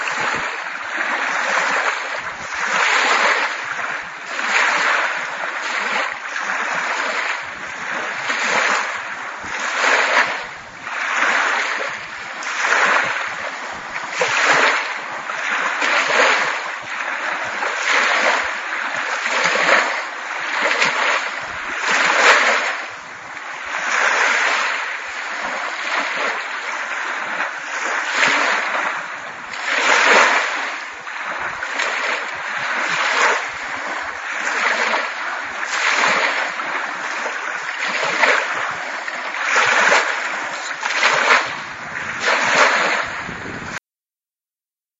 A field recording taken down along the Lake Ontario shoreline in Port Dalhousie. Used an Iriver 799 with a nexxtech condensor mic.
condensormic, field-recording, iriver799, lakeontario, lakeshore, portdalhousie, seashore, waves